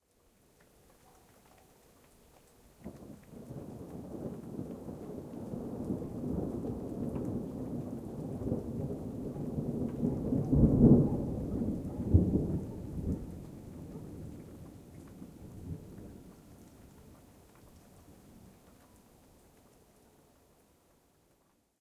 6th May 2013 distant thunder
A single distant thunder has been recorded in the evening on 6th of May 2013, in Pécel, Hungary by my stereo dictaphone.
weather, field-recording